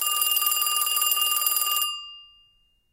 Phone Ringing #3
ring, phone, noise, ringing